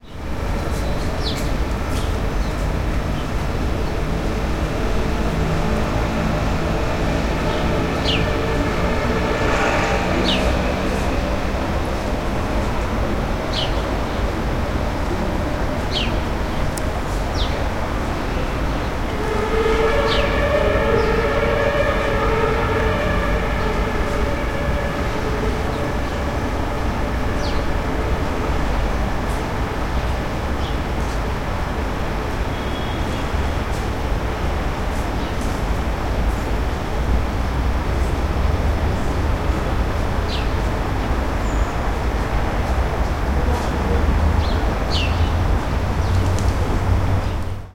Stadt - Berlin, Märkisches Ufer, Herbsttag, Sirenen
Ambience recorded in Berlin at Märkisches Ufer in autumn, some sirens in the background